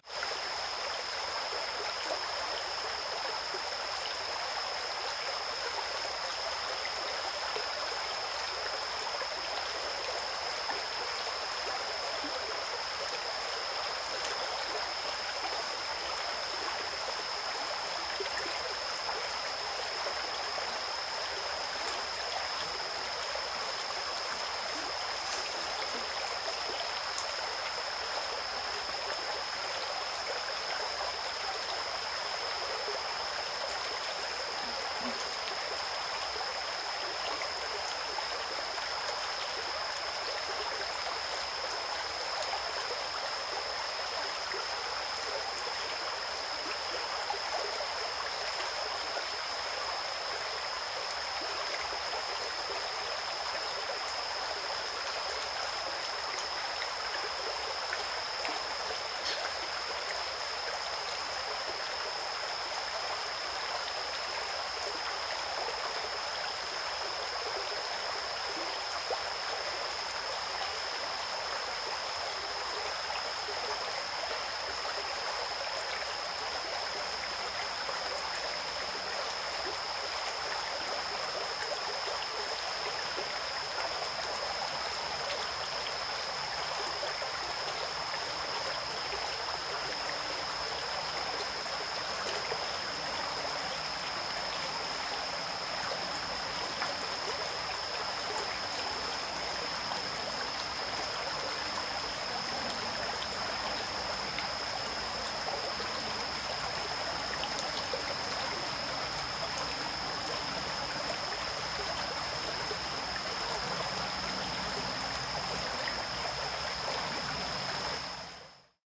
Ambience-Wildlife Duncan Southern Ontario
A field recording of a field near Duncan Ontario.
Actually Mono
sennheiser 412
SD 552
Ambience, birds, bugs, Duncan, Field-Recording, insects, nature, Ontario, wildlife